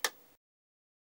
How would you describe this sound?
Light switch click